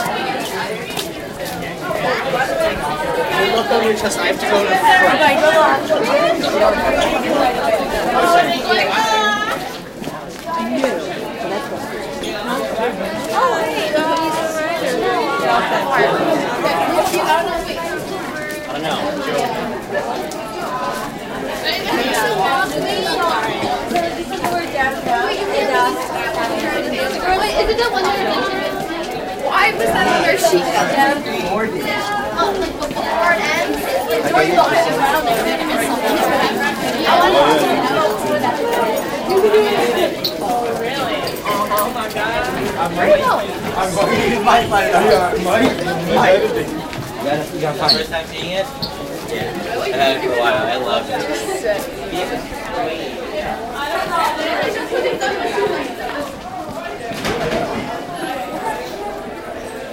GL Hallway 3
Medium/Heavy high school hallway noise in between classes. Mic is moving against the flow of students to give the passing by effect.
betwen-classes, hs, hallway, high-school, students, talking